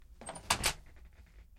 Door Close
A door closing.